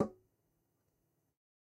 Metal Timbale closed 013
closed, conga, god, home, real, record, trash